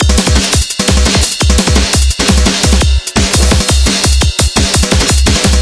A drumsample witch I made with reason. party up!!
bass, schwefel, sulfur, bumpin, beat, drum-and-bass, acid, schlagzeug, drumloop, dark, fat-rythm, drums, drumsample